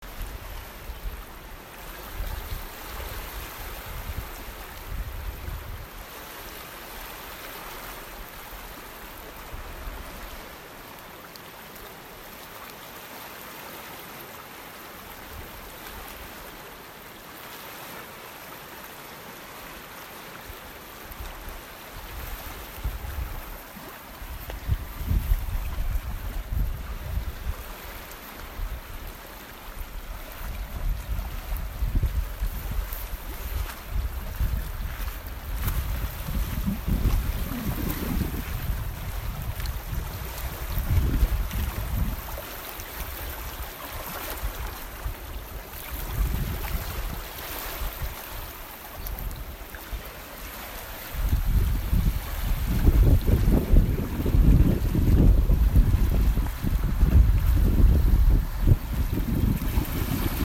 Windy lake, small waves crashing on shore
lake, summer-night-lake, summer, water, windy-lake, Waves